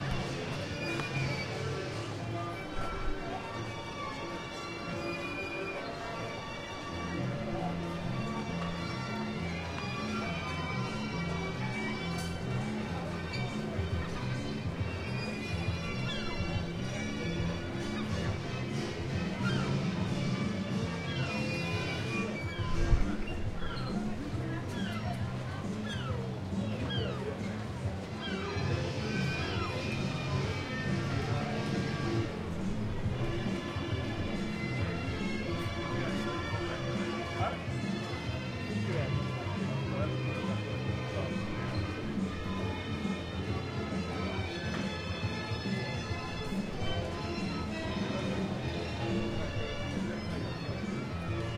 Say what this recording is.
Ambient sounds of sea and people and amusements at Herne Bay, Kent, UK in the last week of July 2021. Things were probably a little quieter than usual because of coronavirus even if the official lockdown ended a week or so earlier.
ambient, Kent, field-recording, Herne-Bay, seaside